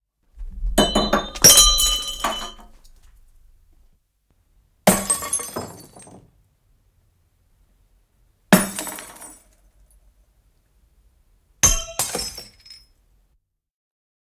Juomalasi putoaa lattialle ja särkyy. Särkymisiä, rikkoutumisia, erilaisia versioita.
Äänitetty / Rec: Analoginen nauha / Analog tape
Paikka/Place: Yle Finland / Tehostearkisto / Soundfx-archive
Aika/Date: 1970-luku / 1970s